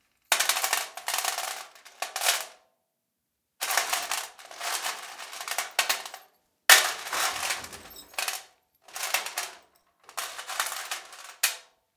different noises produced with the screws, nails, buts, etc in a (plastic) toolbox